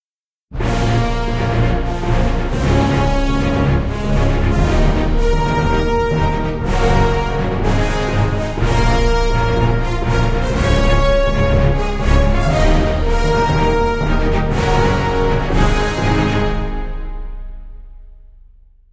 superhero theme
Theme perfect for a superhero scene, inspired by Avengers, this was made in my DAW with some orchestral sample libraries.
superman, strings, film, orchestral, ost, powerful, dramatic, music, avengers, orchestra, superhero, entrance, flash, theme, drama, increasing, fanfare, movie, brass, cinematic